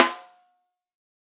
PPS13x3 SM7B VELO11RS
For each microphone choice there are eleven velocity layers. The loudest strike is also a rimshot. The microphones used were an AKG D202, an Audio Technica ATM250, an Audix D6, a Beyer Dynamic M201, an Electrovoice ND868, an Electrovoice RE20, a Josephson E22, a Lawson FET47, a Shure SM57 and a Shure SM7B. The final microphone was the Josephson C720, a remarkable microphone of which only twenty were made to mark the Josephson company's 20th anniversary. Placement of mic varied according to sensitivity and polar pattern. Preamps used were Amek throughout and all sources were recorded directly to Pro Tools through Frontier Design Group and Digidesign converters. Final editing and processing was carried out in Cool Edit Pro.
13x3, drum, multi, pearl, piccolo, sample, shure, sm7b, snare, steel, velocity